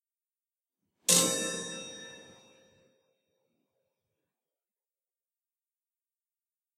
Chime Strike, Variant #5
Plastic pen striking several simultaneous rods from this set of grandfather clock chimes:
Set contains eight rods roughly corresponding to these notes in scientific pitch notation: D#4, F4, G4, G#4, A#4, C5, D5, and D#5. Some were intentionally muted with my fingers while striking. I don't remember which (and don't have the ear to tell casually ... sorry), but they are the same notes as in other variants of this sound in the sound pack. Intended for organic non-sample-identical repetition like when a real clock strikes the hour.
Recorded with internal mic of 21.5-inch, Late 2009 iMac (sorry to all audio pros 😢).
strike, chimes, chiming, clockwork, grandfather, clock, chime-rod, hour, time, grandfather-clock, chime